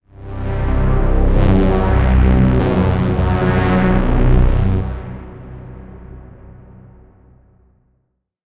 Initial patch created in Ableton Operator. Resampled and processed in Ableton Live.
Dark, Synth, Ambient, Drone